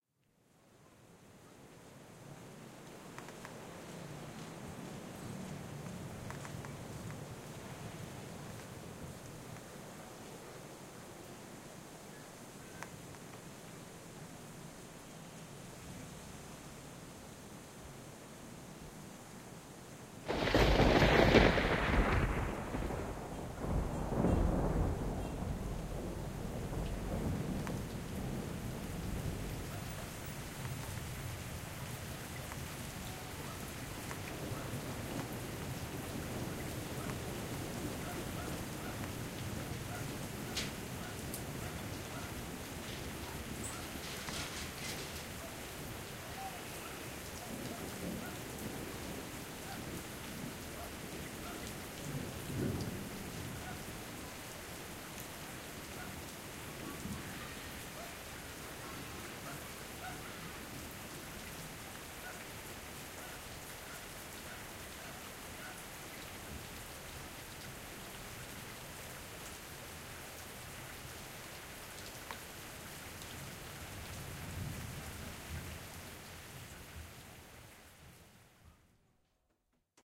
Bunyi no.9 petir hujan outdoor
field-recording, lightning, nature, rain, thunder, weather